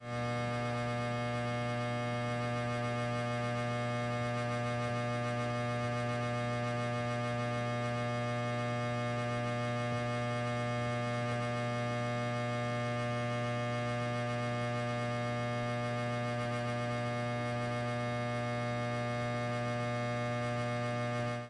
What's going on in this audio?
Machine buzzing
Close-up recording of a harsh-sounding buzz from a machine. I used this as a layer to sound design a headache.